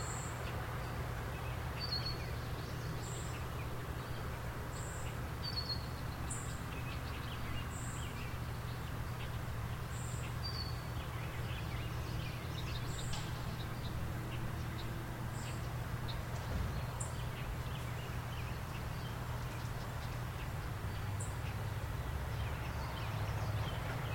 country highway ambience2
Recording of a New Hampshire country highway at the edge of the forest. Birds, insects, trees rustling in the breeze, and a distant car on the highway. Recorded with an SM-57.
insects, mountain, birds, distant-car